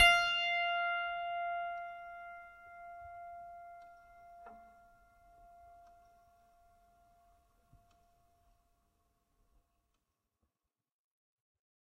a multisample pack of piano strings played with a finger